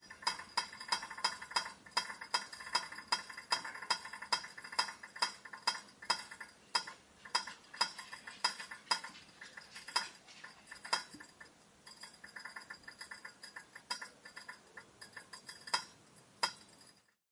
Rattling teapot
This is (probably) a sound of a teapot with water, heated up. Because I can't really tell nor remember what this is. But anyway here is this metallic sound.